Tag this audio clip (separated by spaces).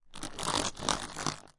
snack chips doritos bag papas envoltura